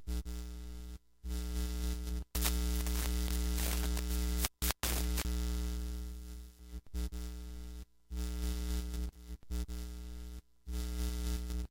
Tube Static Ambience
a static effect as if one were listening to a distorted intercom transmission
ambience, computer, radio, screen, static, tube